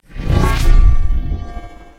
Magic Strike
Magic metal effect, synthesized in Reaper
wizard, effect, spell, game, magic